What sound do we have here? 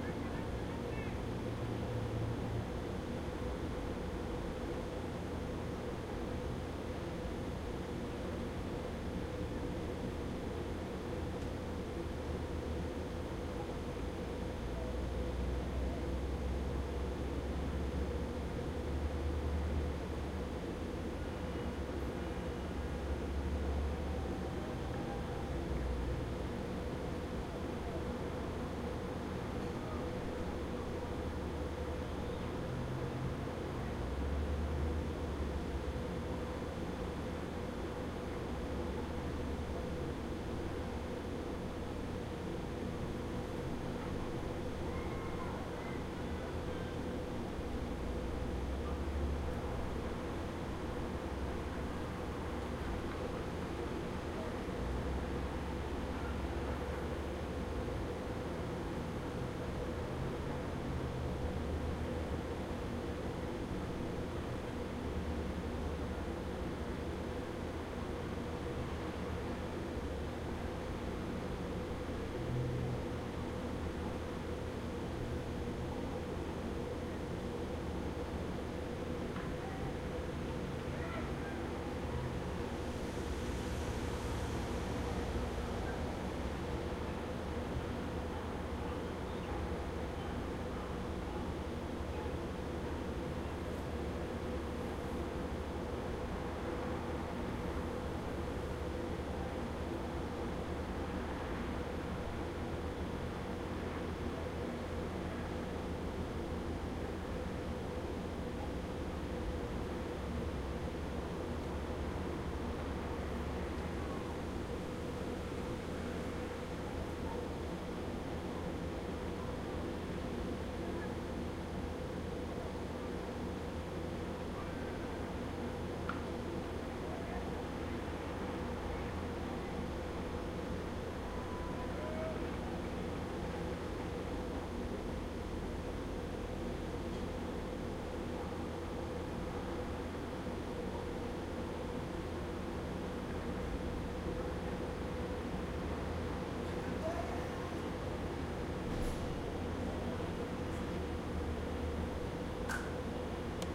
12th Floor Outside Next To Radiators
A recording outside of a tower on the 12th floor at night.
atmosphere, noise, ambient, ambience, city, outside, night, soundscape, field-recording, tower, radiator